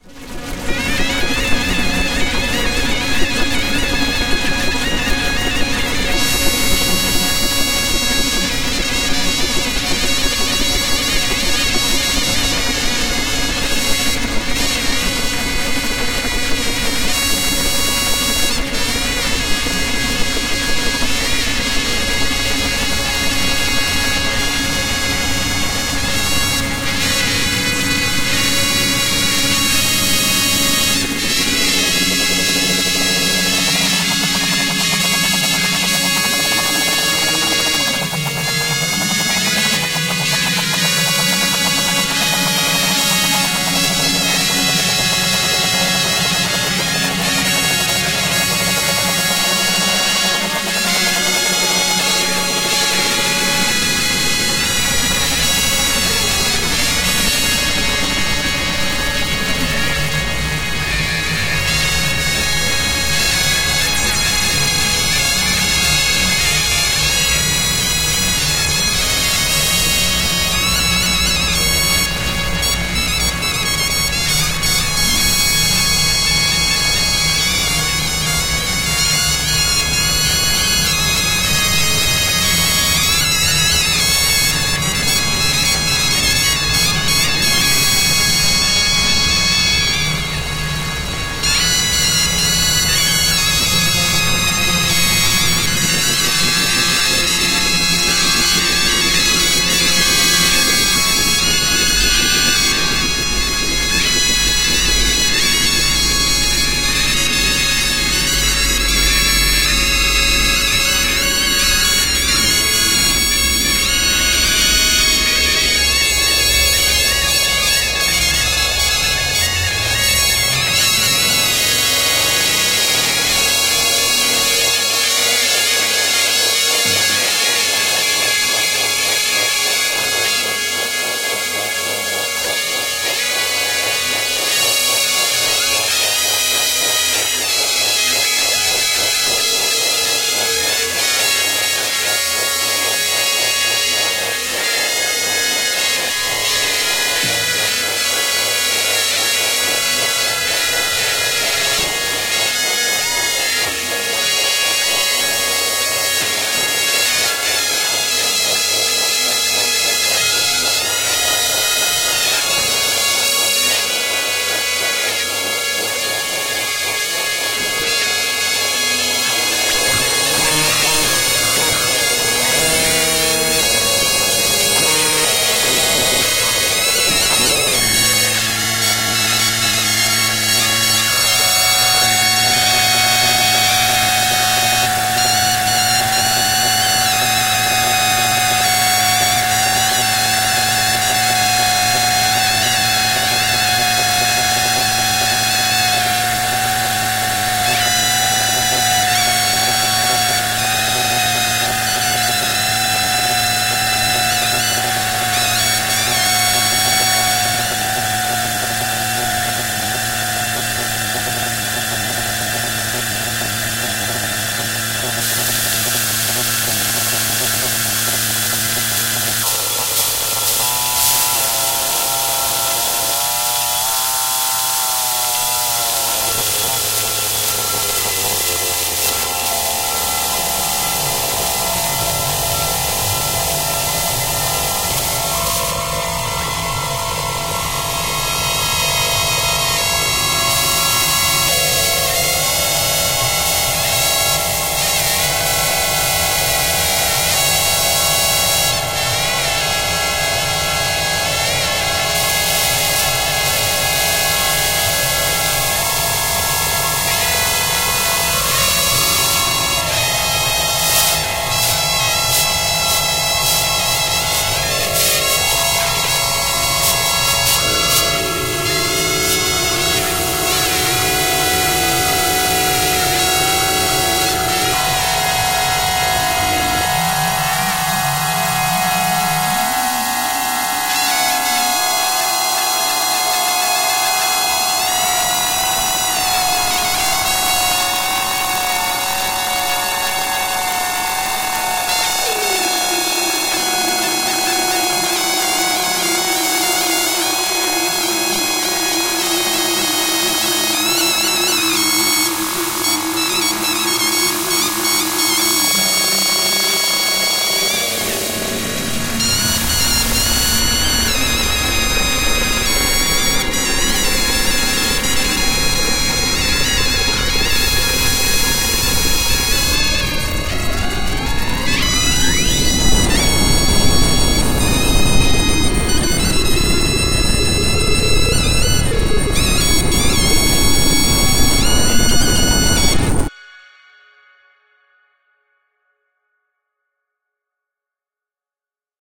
Zurla drone wall of sound
A wall of sound, quite noisy drone - almost an electro-acoustical composition but I consider it merely a raw source material for further composition and modulation. It is constructed of Macedonian and Greek zurna samples, synth noises and audio manipulations.
ambiental, audio-design, drone, electro-acoustic, experimental, noise, synth, wall-of-sound, zurla, zurna